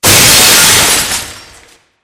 Shattering Chandelier
A sound I created layering different glass breaking sounds together. Done to simulate the sound of a crystal chandelier crashing to the ground. Designed for a production of the show "Clue" in 2020.